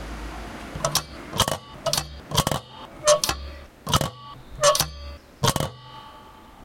The flap of a parking meter is opened and then closed again.
flap, paking-meter, squeaking